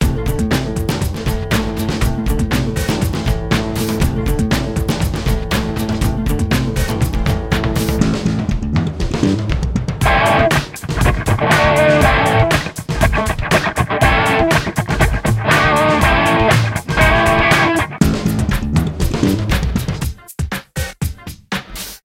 I am on the road and I just stole an MMs pack from the vending machine using a fake coin > Music
Little song loop made with Garage Band.
Use it everywhere, no credits or anything boring like that needed!
I would just love to know if you used it somewhere in the comments!